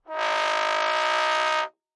One-shot from Versilian Studios Chamber Orchestra 2: Community Edition sampling project.
Instrument family: Brass
Instrument: OldTrombone
Articulation: buzz
Note: D3
Midi note: 50
Room type: Band Rehearsal Space
Microphone: 2x SM-57 spaced pair
single-note, multisample, midi-note-50, d3, vsco-2, buzz, oldtrombone, brass